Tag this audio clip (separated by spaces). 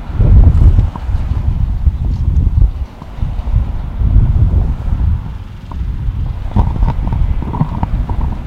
gale wind weather windy